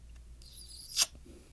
a small kiss